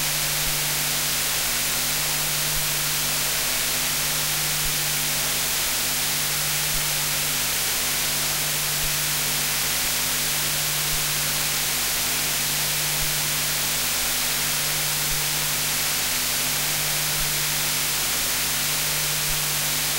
Brus SpaceEcho RE-501 Echo+Chorus+Spring
This is how a Roland Space Echo sounds if you don't run a signal thru it.
echo, chorus, spring, roland, space, noise